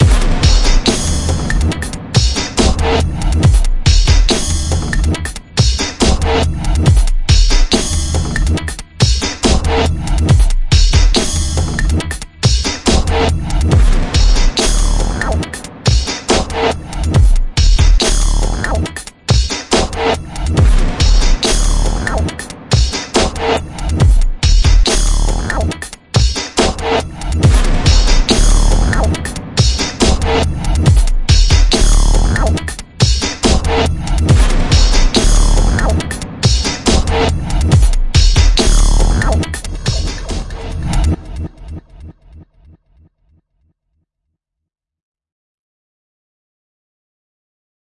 Nu Skool X Proto-Beat
Here's for you this generously processed fat beat ready for use in a dubstep or hip-hop production.
3 Bars with slight variations.
Decent amout of sub in the kick, and a fx end-tail.
*Would appreciate a link to your finished projects using it!